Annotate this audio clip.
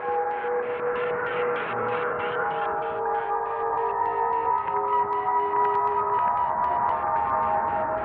creepy crowd scape
field-recordings, ambience, crowd